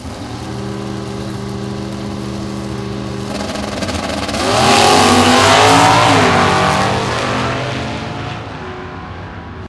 Recorded using a Sony PCM-D50 at Santa Pod raceway in the UK.